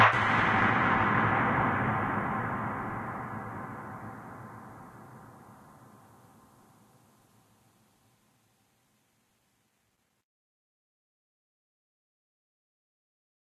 sound of suspense/thriller hit for various uses